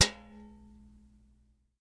This is a mallet hitting a cheese grater.